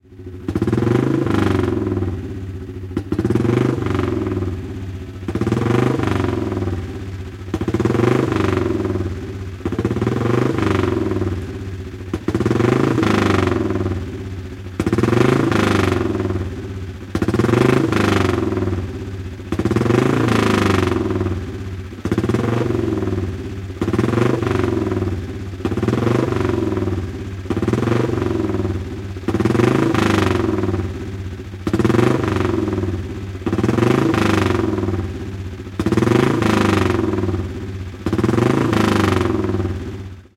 Moottoripyörä, vanha, lämmittely, kaasutus / An old motorbike, warm-up, revving, Norton, a four-stroke racer
Moottoripyörä Norton, lämmitystä paikalla, kevyttä kaasuttelua, 4-tahtinen kilpamoottoripyörä.
Paikka/Place: Suomi / Finland / Vantaa
Aika/Date: 1976
Field-Recording, Finland, Finnish-Broadcasting-Company, Moottoriurheilu, Motorbikes, Motorcycling, Motorsports, Soundfx, Suomi, Tehosteet, Yle, Yleisradio